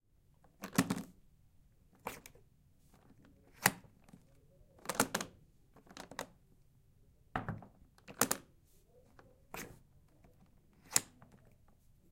SFX - various briefcase latch sounds
Close-perspective recording of a briefcase latch being opened and closed, the handle, briefcase put down on a wooden floor.
Recorded as part of a sound design class prac using a Zoom H6 recorder with XY capsule set to 90º.
close, latch, open, trunk